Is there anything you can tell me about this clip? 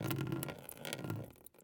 drip on plastic004
Drip recorded in an anechoic chamber using a Studio Projects B-1 LDM into a MOTU 896. Unprocessed.
The drips are coming from a bottle about 30 cm above a plastic bucket.
Quick succesion of drips. Hear both watery and plastique sounds pitch modulates or perhaps it sounds more like a filter opening and closing.